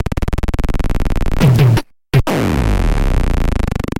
Recorded from a simple battery toy, were the pitch resistor is replaced by a kiwi!
From an Emmanuel Rébus idea, with Antoine Bonnet.